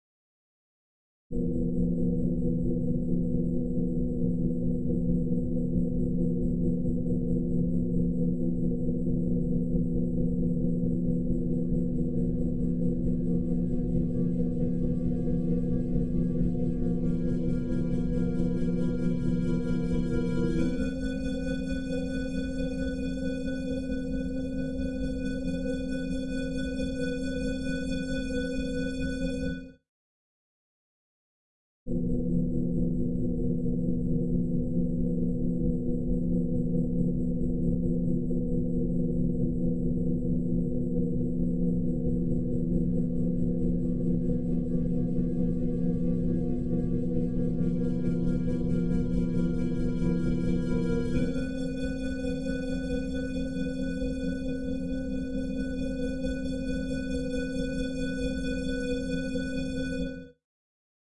Waldord Nave space sound
A space-type sound made with Waldorf Nave
space, waldord, waldord-nave